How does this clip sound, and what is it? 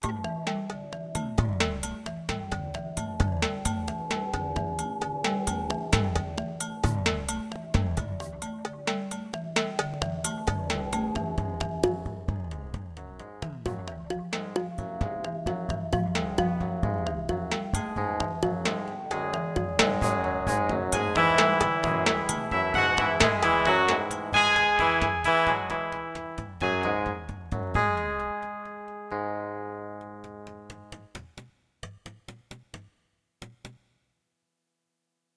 Vivace, con screamo - Electric Solo
This is a remake of Bartok's "County Fair". It was originally input as MIDI into Digital Performer. Many of the original notes are changed with patches and some editing. Bartok's original rendition was with a single piano. Honestly after redoing it I thought he would turn over in his grave screaming if he heard my version. So, I gave it the name "Vivace, con screamo". I also added a piano solo section in it from parts of the original. Enjoy!
bartok, con, county, fair, screamo, song, vivace